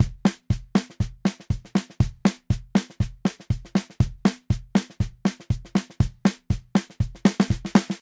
120 Country Drum Loop
120bpm Country Drum Loop
kick, mojo, mojomills, snare, drumloop